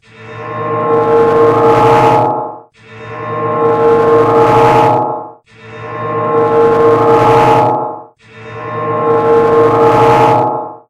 abduction ray
I took an extremely weird sound that I made with my mouth and distorted with reverb and stuff. This sounds like a sci-fi sound that could indicate some kind of alien ray doing something like abducting cows or teleporting/beaming.